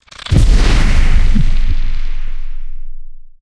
A spell sound to be used in fantasy games. Useful for reviving the dead - in an evil way.
fairy, fantasy, indiedev, videogames, epic, witch, wizard, indiegamedev, video-game, gaming, magical, spell, rpg, gamedev, magician, game, magic, gamedeveloping, game-sound, effect, sfx